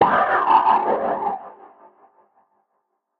BATTERIE PACK 2 - Space fighter passing shot
BATTERIE 02 PACK is a series of mainly industrial heavily processed beats and metallic noises created from sounds edited within Native Instruments Batterie 3 within Cubase 5. The name of each file in the package is a description of the sound character.
industrial, processed, short, drum-hit, beat